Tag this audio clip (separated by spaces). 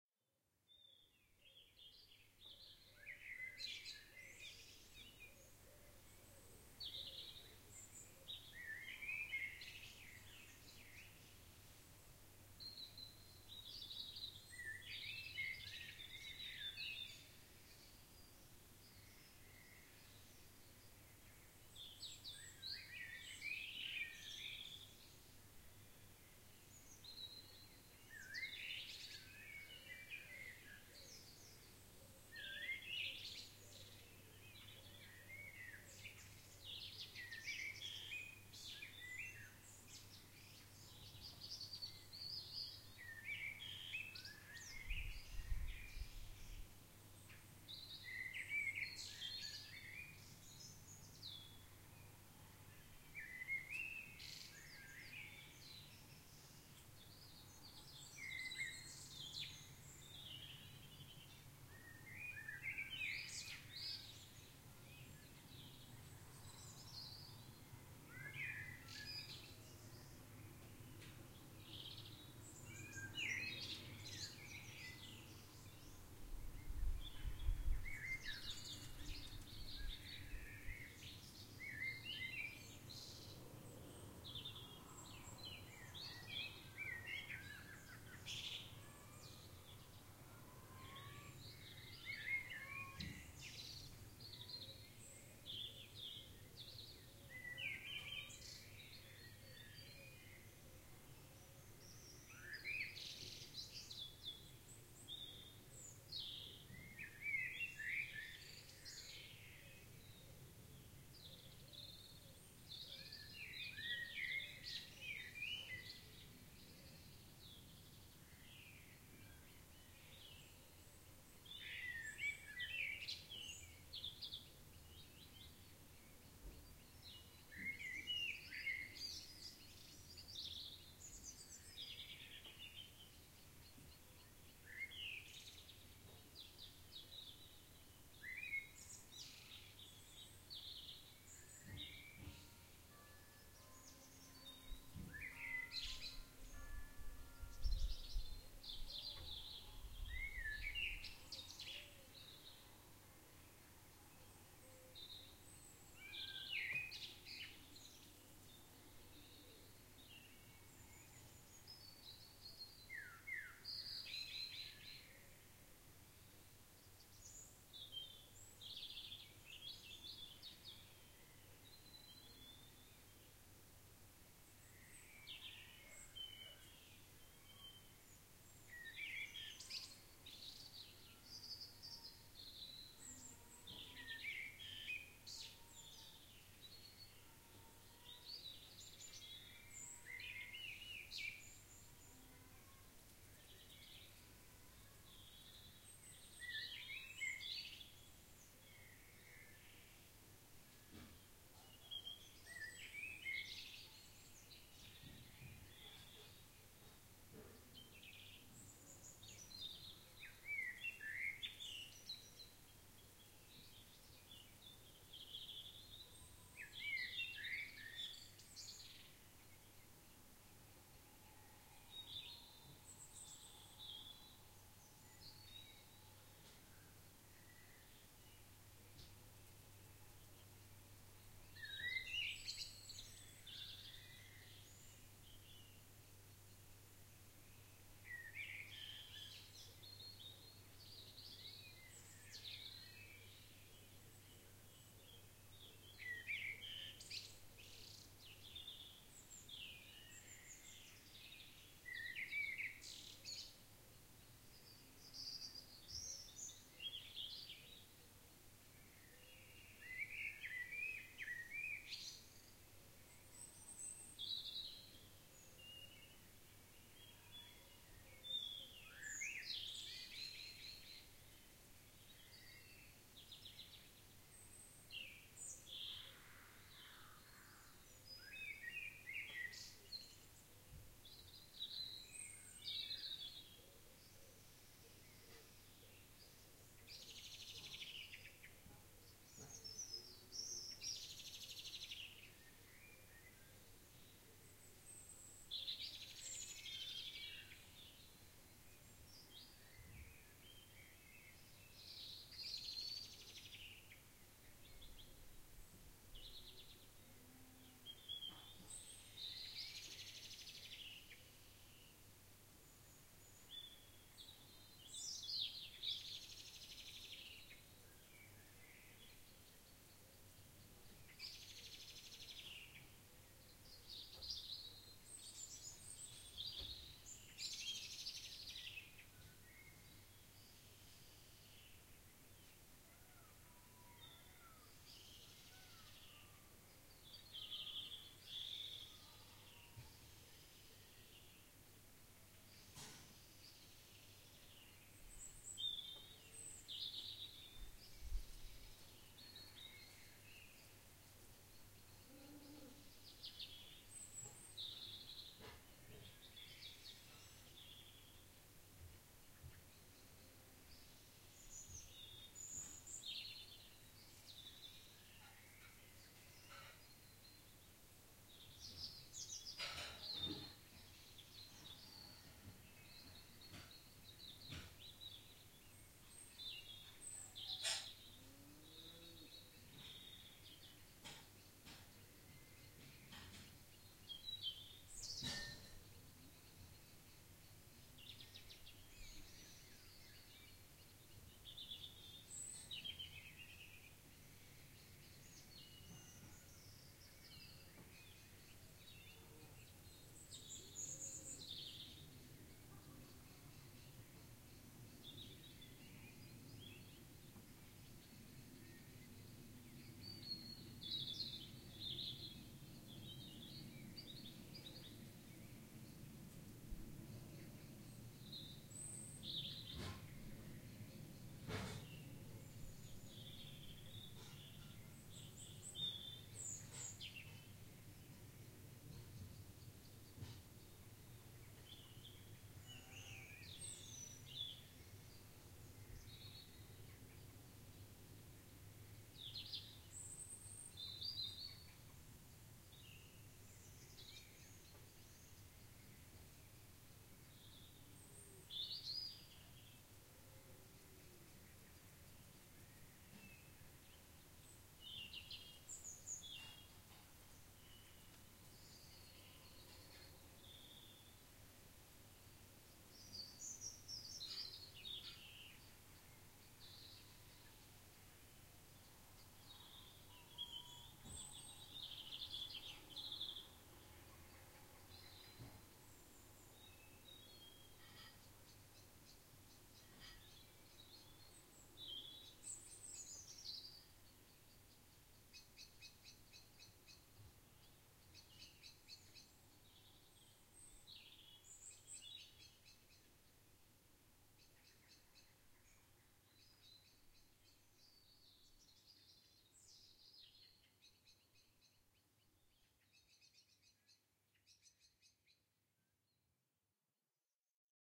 Birdsong Peaceful Birds Morning Field-recording Nature Evening Environment